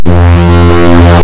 bass brass
short clips of static, tones, and blips cropped down from raw binary data read as an audio stream. there's a little sequence marked as 'fanfare' that tends to pop up fairly often.
data, digital, electronic, glitch, harsh, lo-fi, noise, raw